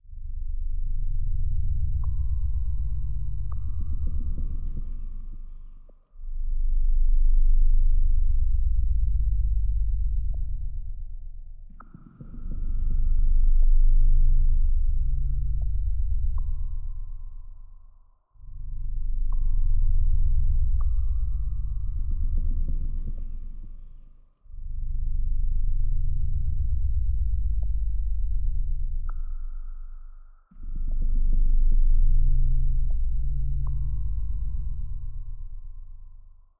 A creepy Cave ambient sound with sub frequencies, droplets and wall cracking sounds.
ambient, creepy, underground, cave, dark, atmosphere